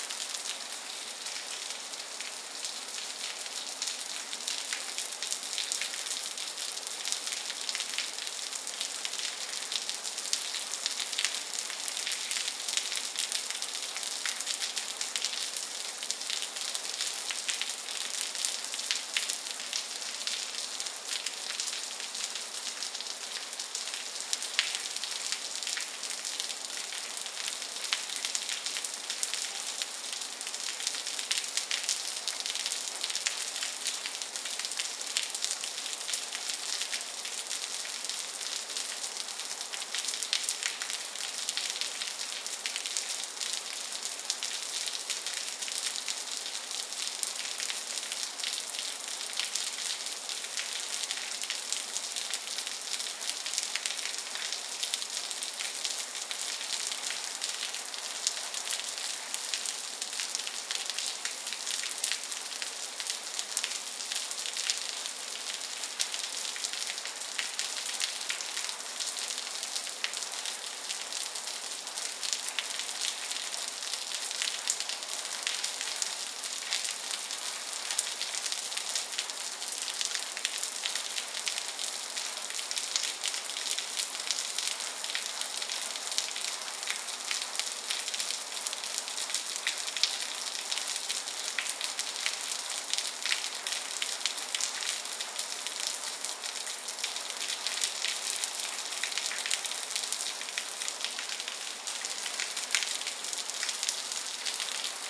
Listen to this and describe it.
AMB M City Rain Heavy
This is a heavy rain sound recorded in the courtyard of my apartment building. There's a heavy stream of water splashing from the second floor balcony.
Recorded with: AT 4073a, Sound Devices 702t